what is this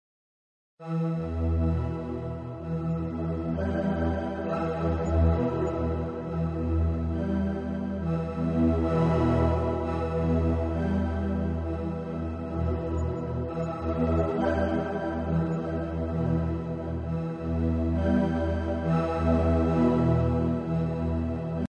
PADDD tr11-09
electronica, trance